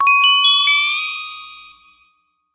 Fantasy SFX 003
Upward gliss. Originally used for a story.
bell, cheesy, chimes, fairy, fantasy, magic, sparkle, sparkly, spell, tinkle, upwards